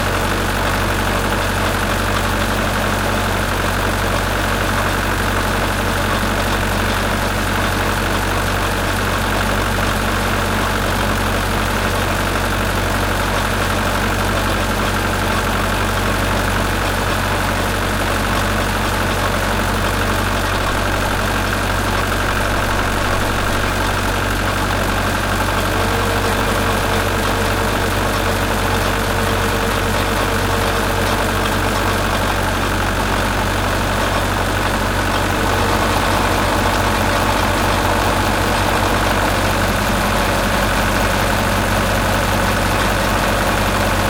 Diesel Engine
field-recording, mechanical, motor, ship